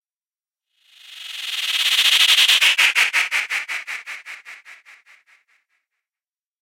white noise pass long<CsG>
granular passby. Created by Alchemy Synth
alchemy, digital, granular, sound-design, synthesis, whoosh